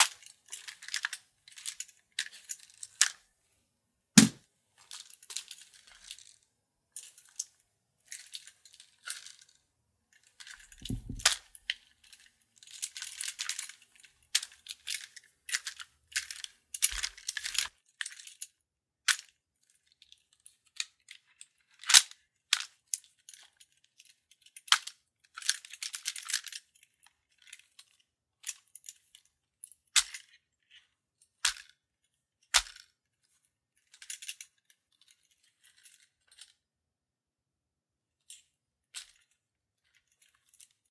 GUNMech M1 GARAND HANDLING MP
Field recording of an M1 Garand being handled, shaken, aimed, and bumped. This sound was recorded at On Target in Kalamazoo, MI.
rifle, handling, grand, firearm, magazine, ping, garand, rustle, caliber, shake, 30, gun, 30caliber, m1garand